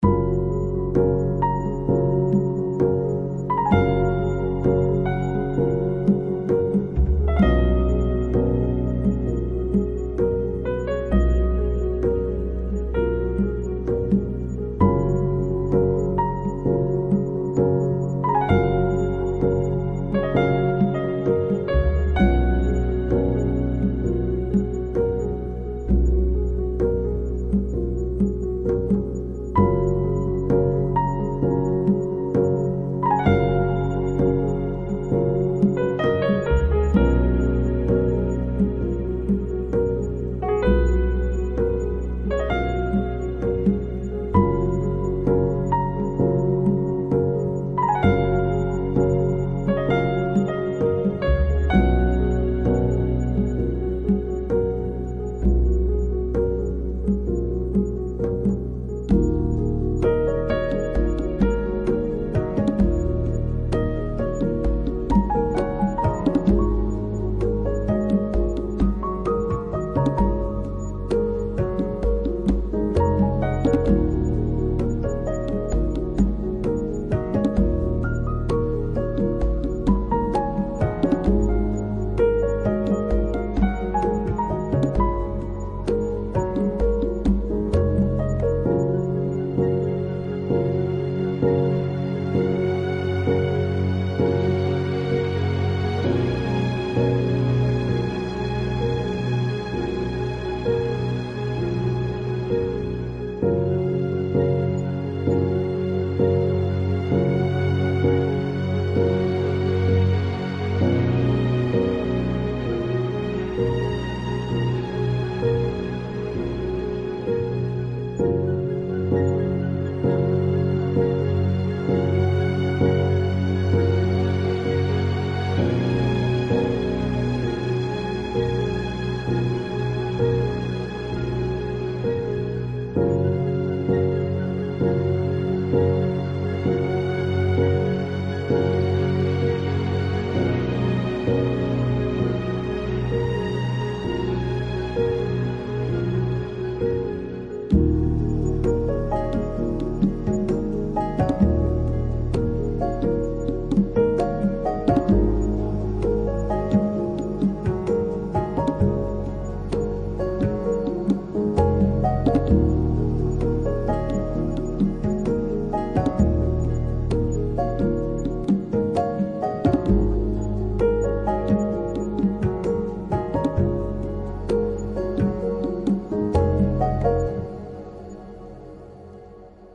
Genre: Relaxing.
Track: 68/100
Sorry that I'm out for a while, anyway I'm back.